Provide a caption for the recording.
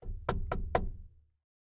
Wood Knocks Muffled 1
Knocking on wood. Recorded in Stereo (XY) with Rode NT4 in Zoom H4.
knocks, plank, door, wood, knocking, block, woodblock, rolling, square